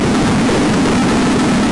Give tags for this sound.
Noise Alien Electronic Machines

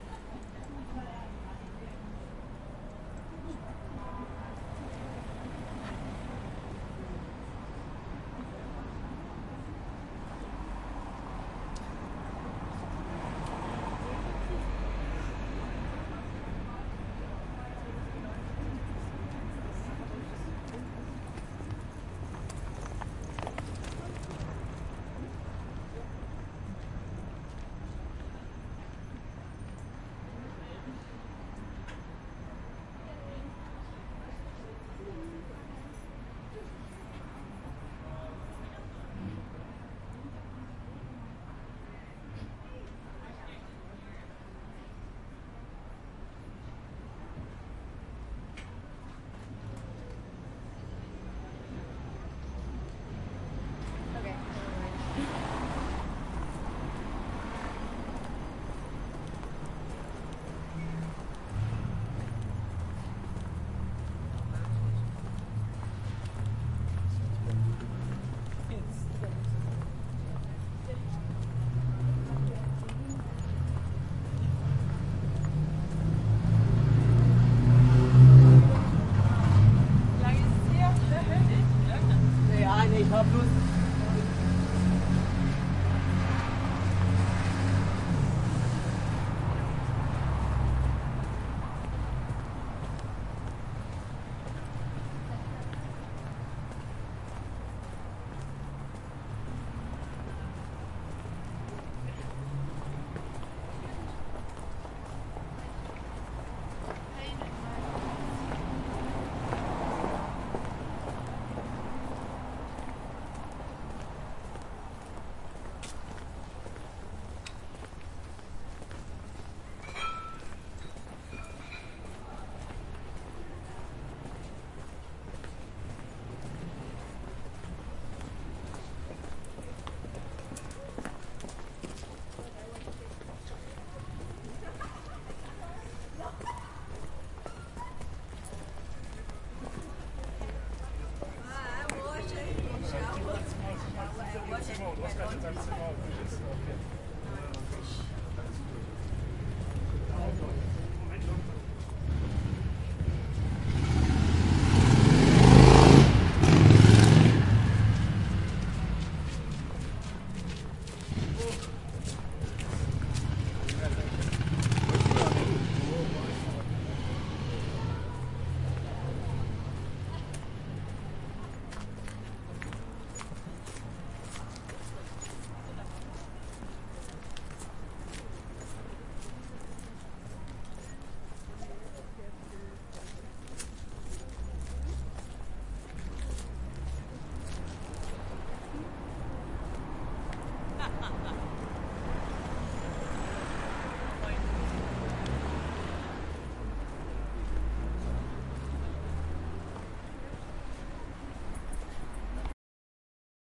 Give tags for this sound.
foley movement travel walking

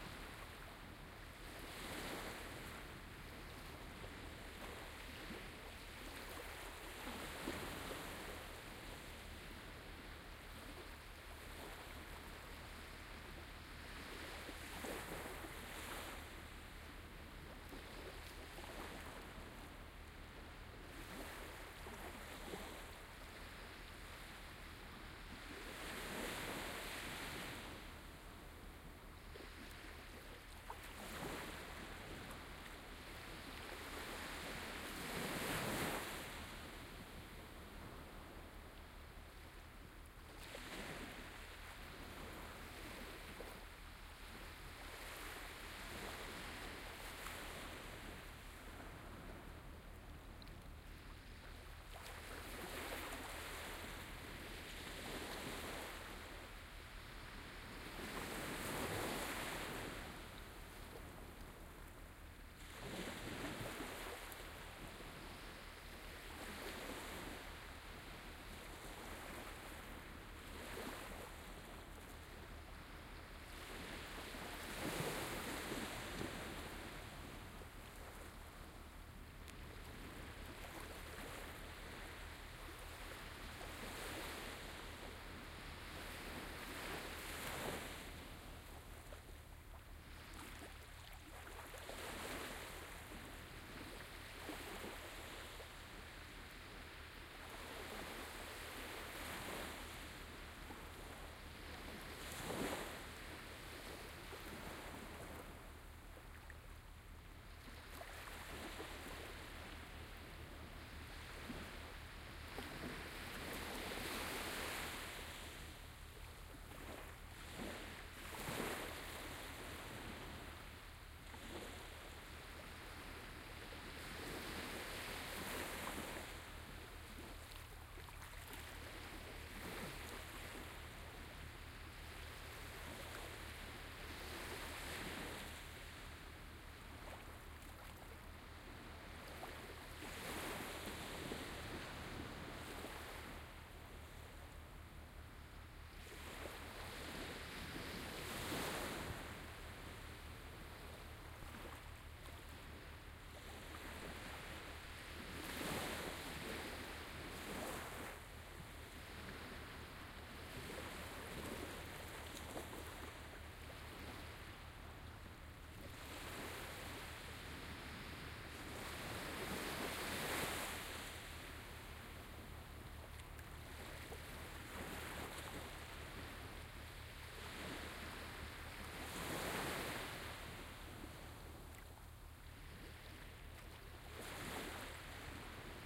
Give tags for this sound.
binaural denmark field-recording northsea ocean sea skallingen waves

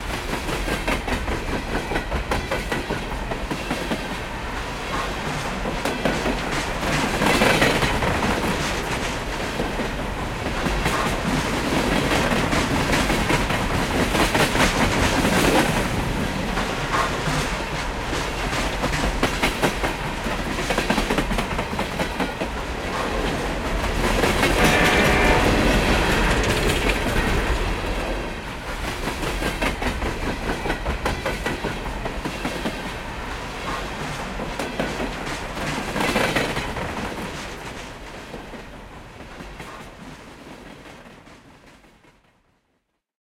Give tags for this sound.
morphagene mgreel field-recording

